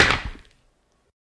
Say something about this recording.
0015 Bag Drop
Recordings of the Alexander Wang luxury handbag called the Rocco. Bag drop
Alexander-Wang, Handbag, Hardware, Leather